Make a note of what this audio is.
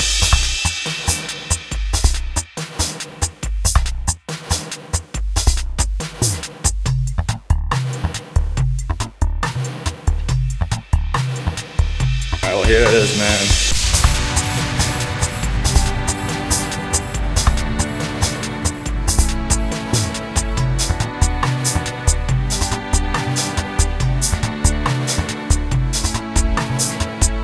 Here It Is Man 140bpm 16 Bars
Dubstep beat with orchestra and sample
Beats, Dubstep, loops, mixes, samples